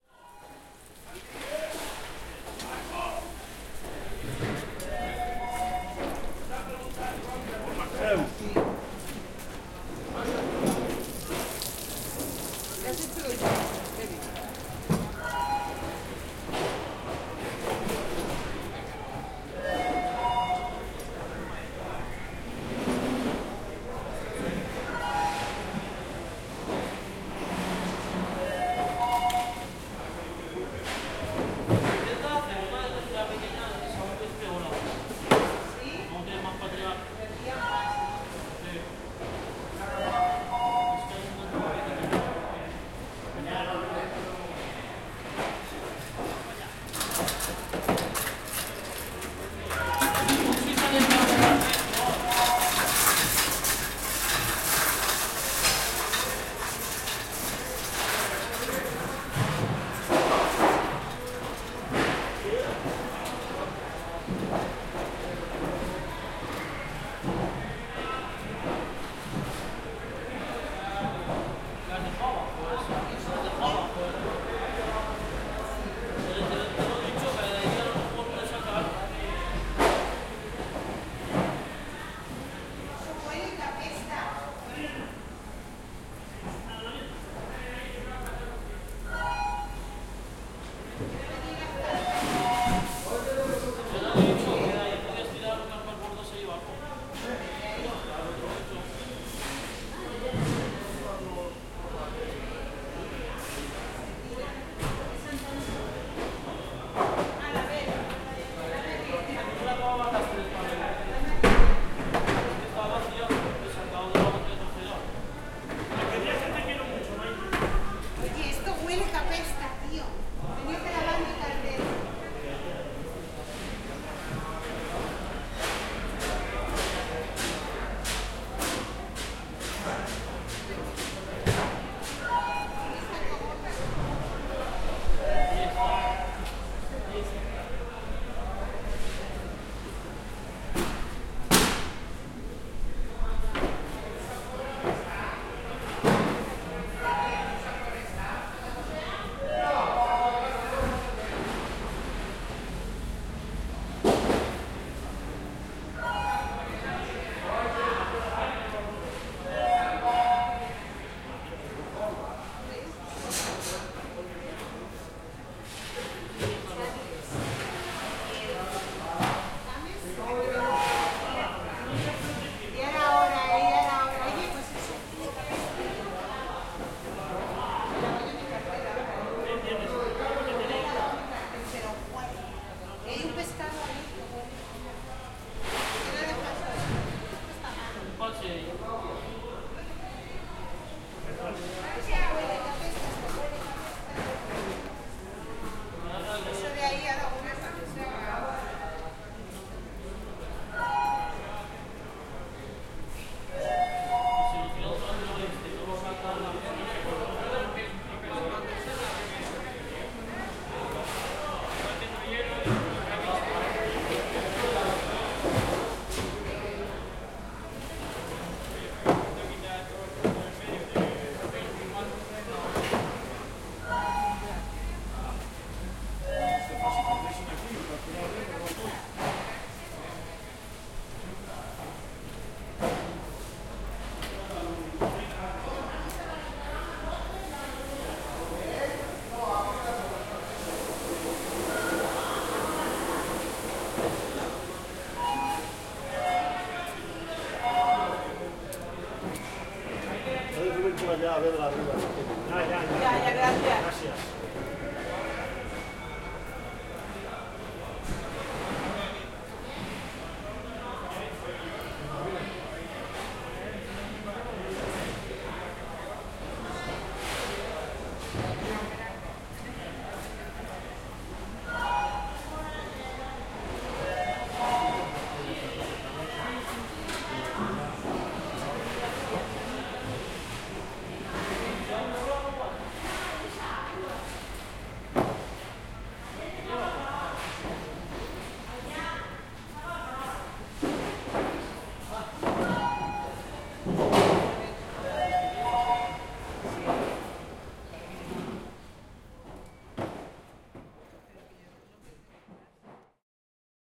01. Preparando cajas para el pescado en lonja 17.00
Ambient recorded before a fish auction at the fish market of Gandia. You can hear fishermen talking and working. Also the electronic bids are being tested.
box-movements, electronic-bids, people, water, Working-ambient